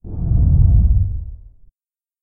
A short, deep whosh.